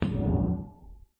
tin plate trembling
plate, tin